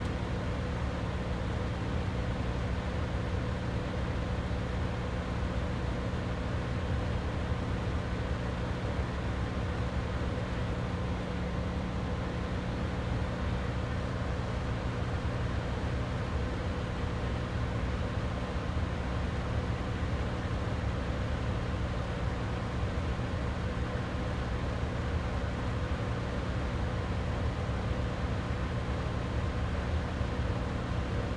I recorded my air conditioner sound recently. It's one of those thermostat style ones, not the window attachment one. This was recorded right on the vent taking in air as well.
Setup:
Zoom H1 (for stereo sounds)
Rode Videomic NTG (for mono sounds)
ac, air-conditioner, air-conditioning, hum, noise, ventilator